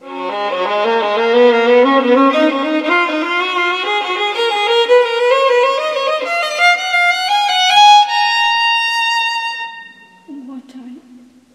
Baroque 06 - Scale Improvising (Take 2)
Baroque Phrases on Violin. Improvising on a Whole G Major Scale while Ornamenting. (AGAIN!)
Baroque, Improvising, Ornamentation, Phrasing, Scales, Trills, Violin